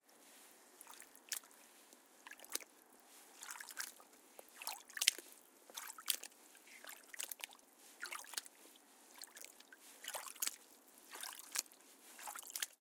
Slow Water Footsteps
Recorded using a Zoom H4n.
Slow footsteps walking in a small static stream.
splash, stream, nature, england, river, field-recording, footsteps, slow, liquid, cheshire, water